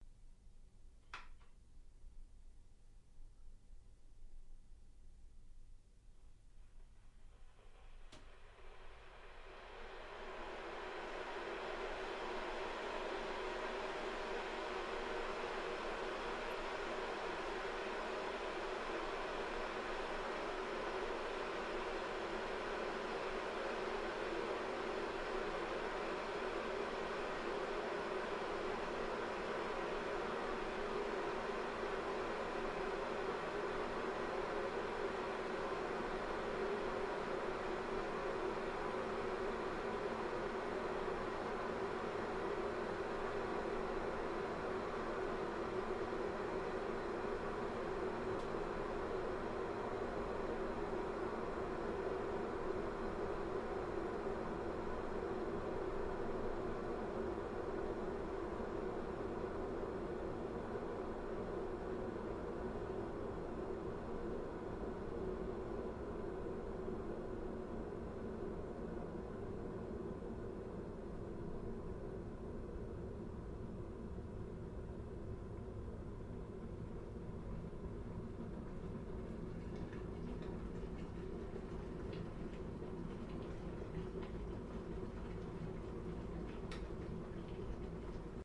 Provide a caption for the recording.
kettle A monaural kitchen
Recordings of kettles boiling in a simulated kitchen in the acoustics laboratories at the University of Salford. From turning kettle on to cut-off when kettle is boiled. The pack contains 10 different kettles.